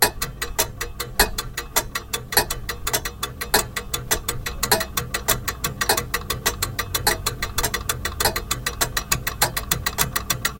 Time Running Out
A game show sound played during the last 10 seconds of time to answer the question.
Edited with Audacity.
Plaintext:
HTML:
arcade, clock, faster, game, game-show, hurry, limit, lose, lost, show, tac, tic, tick, ticking, time, time-is-running-out, timer